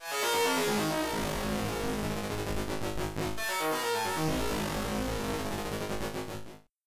Retro Melodic Tune 27 Sound
Heavy melodic tune.
Thank you for the effort.
old, sample, tune, effect, original, retro, heavy, cool, computer, game, school, sound, melody, melodic, 8bit